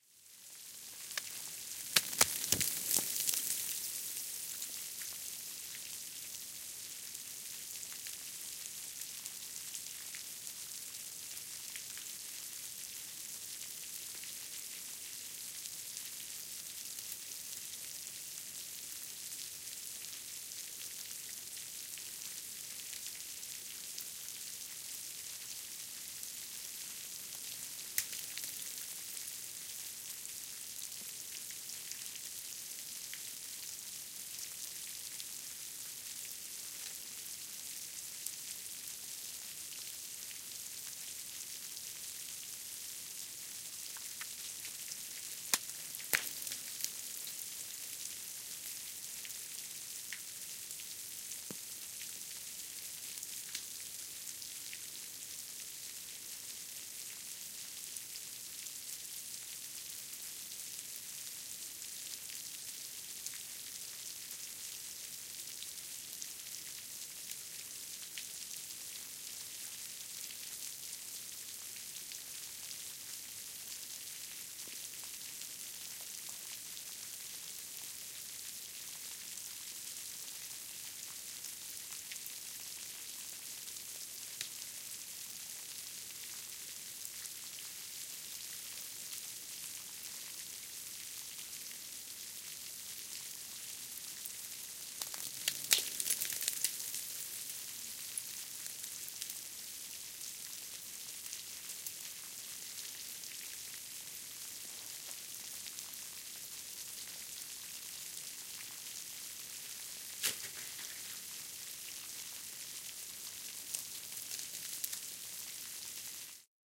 Schmelzwasser SkitourTB
recorded in the Swiss alps in spring. this was a huge tunnel under a glacier and I had my DAT and to omnis with me.